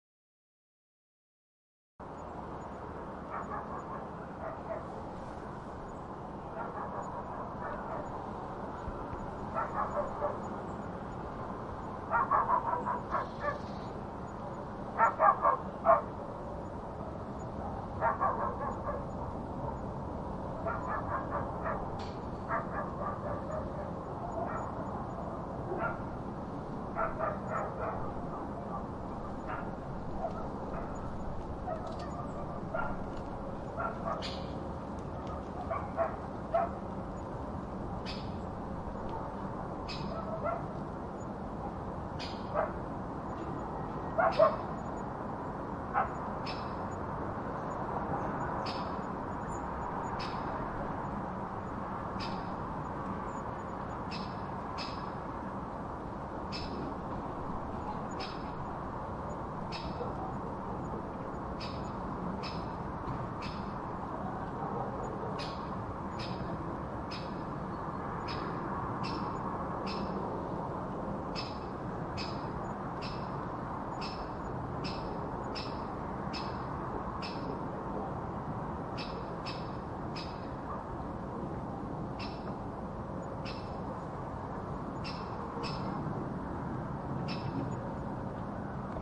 Ambience village2
Ambience with dog
Village-ambience, Cz, Panska, Czech